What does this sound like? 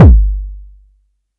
This is Model 15 app kick recorded with Solid State Logic audio interface and some other analog gear.Have fun!
Greets and thx!